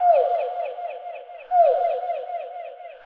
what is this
reinsamba Nightingale song dublostinspace1-rwrk
reinsamba made. the birdsong was slowdown, sliced, edited, reverbered and processed with and a soft touch of tape delay.
birdsong, fx, funny, score, space, dub, effect, reverb, natural, spring, animal, ambient, electronic, tape, soundesign, echo, nightingale, delay, happy, reggae, bird